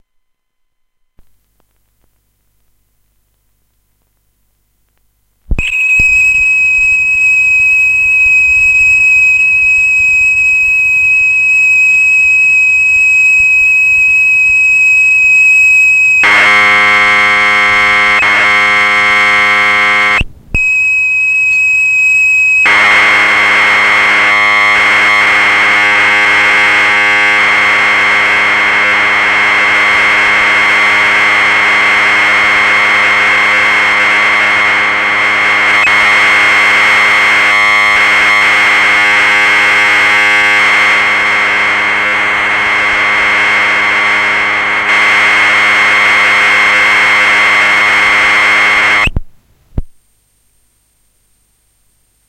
Commodore cass 1
Part of a Commodore 64 cassette played on a deck and recorded through the line-in. This one may be ABC Turbo.
cassette, commodore, datassette